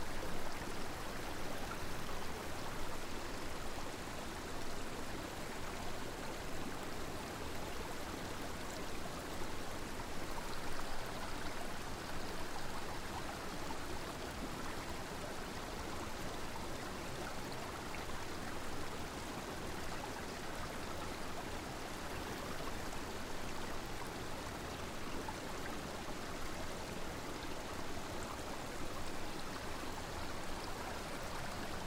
A recording of a calm section of water. The water runs through rocks/pebbles/stones giving a trickling sound.
Calm, Trickle, White, water